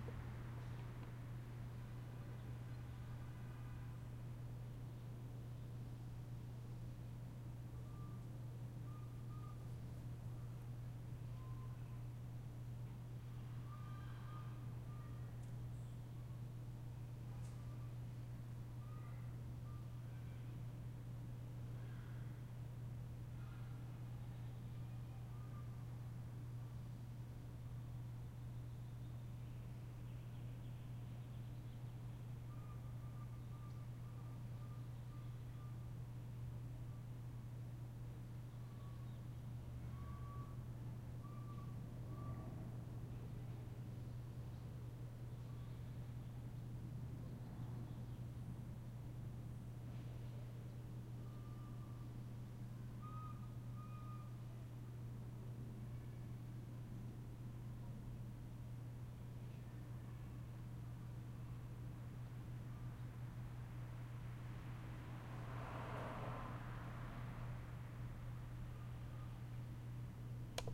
Field recording of a parking garage at night.